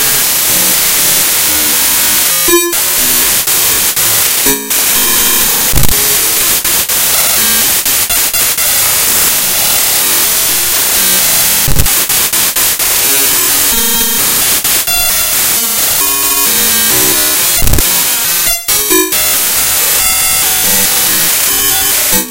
created by importing raw data into sony sound forge and then re-exporting as an audio file.
clicks, data, glitches, harsh, raw